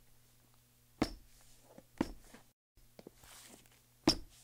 shoes squeaking on a tile floor